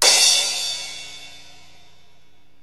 crash 3 hit 1
This was hit on my 14" tama.
heavy, hit, live, metal, splash